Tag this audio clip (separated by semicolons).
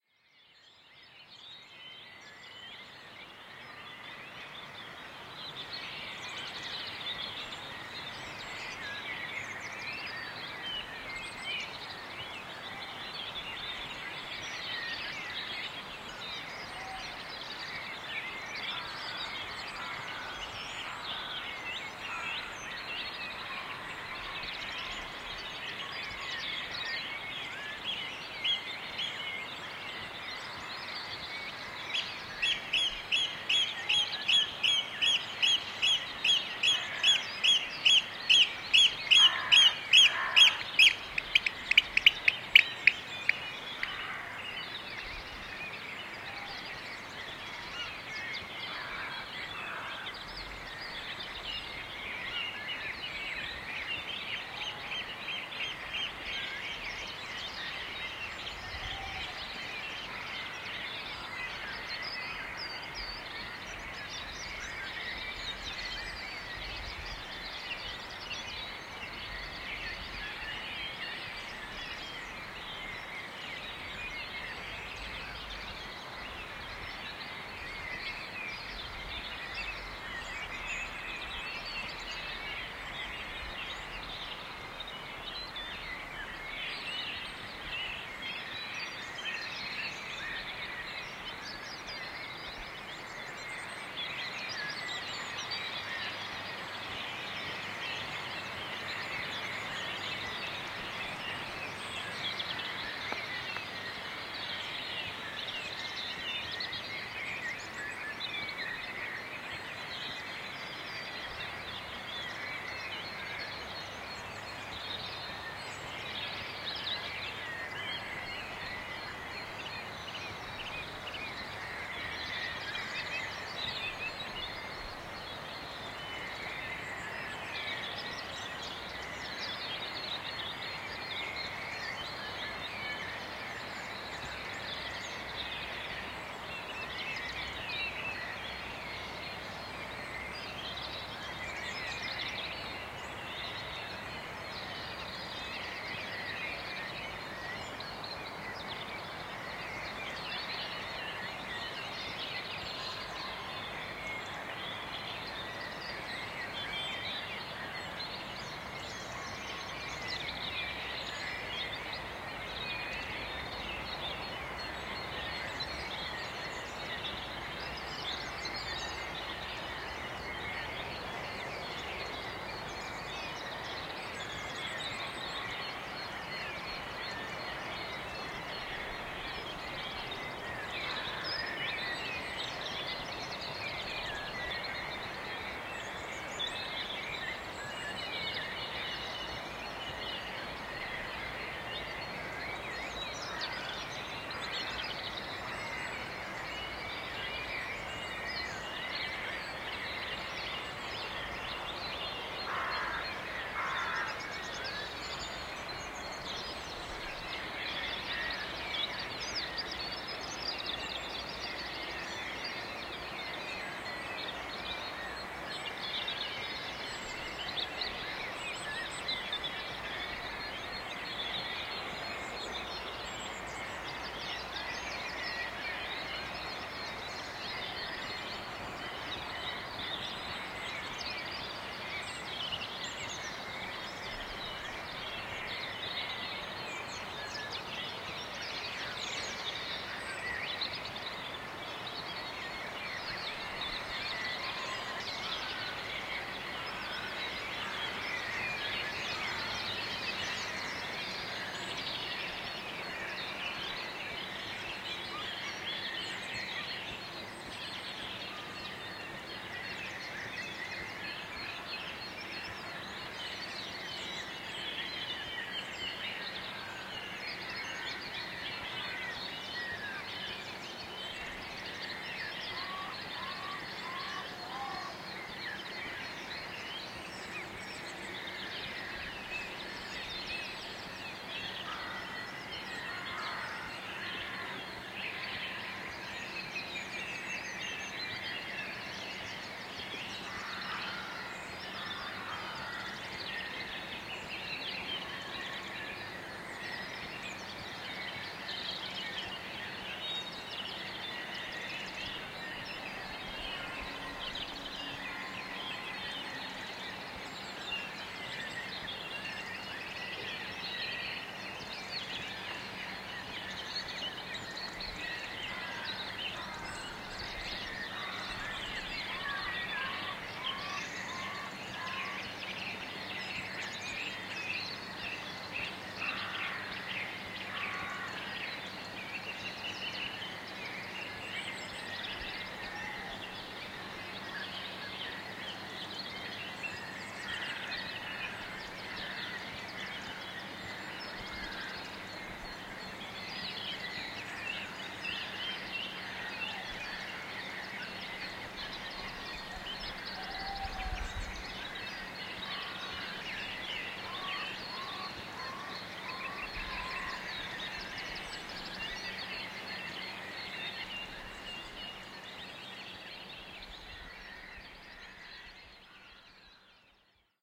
bird; birds; birdsong; crows; dawn-chorus; field-recording; hoot; hooting; nature; owl; owls; oystercatcher; screech